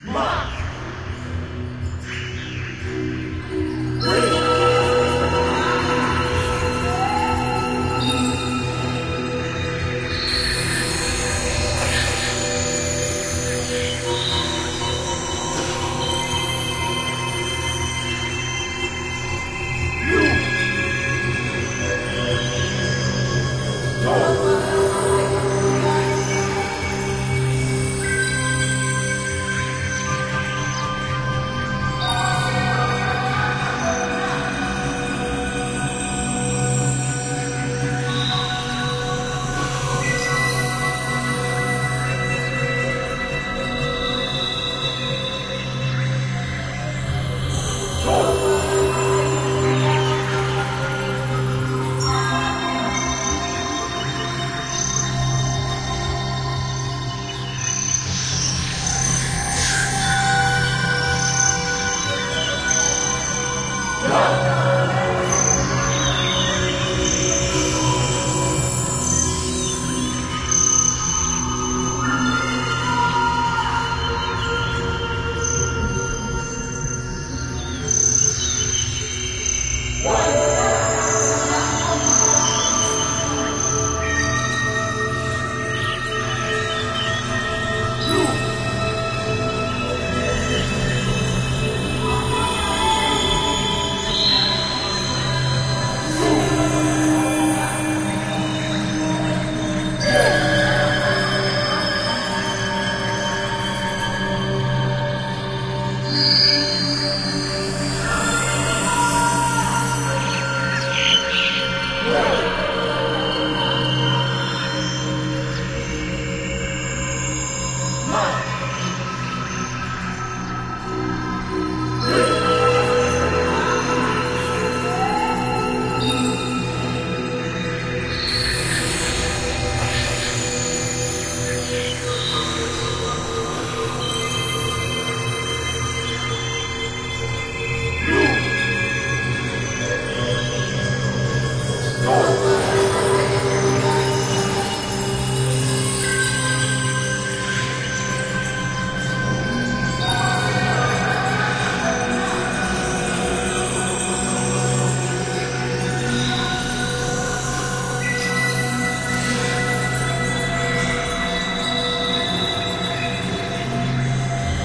Myst Jungle Tribal Dark Thriller Vocal Atmo Woman Men Animals Birds Drone Cinematic Surround